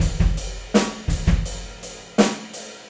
Rock beat loop 4 - Rockband Umbrella beat ride
A loop with a ride beat similar to the Umbrella beat.
Recorded using a SONY condenser mic and an iRiver H340.
beat, drum, loop, ride